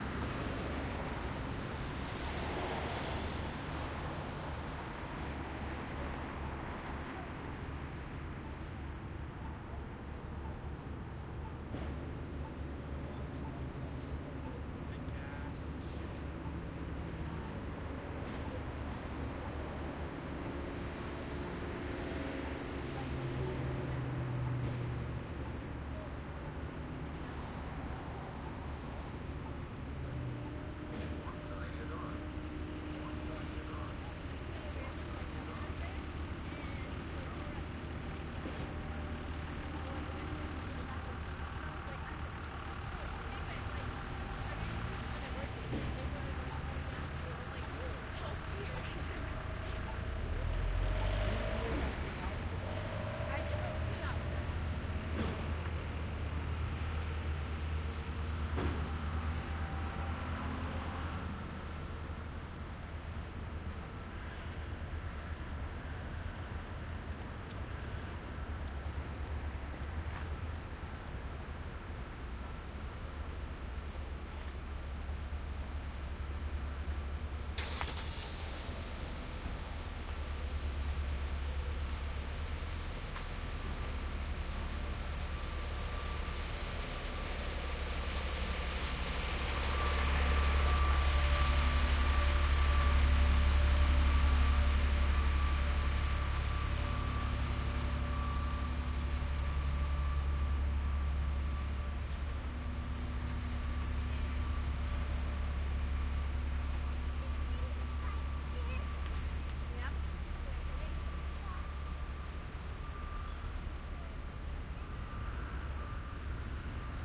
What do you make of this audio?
walking downtown 3
Walking aimlessly around downtown Portland, Oregon. People talking, traffic and at 1:17 a large machine starts then drives by. Recorded with The Sound Professionals binaural mics into a Zoom H4.
people, noise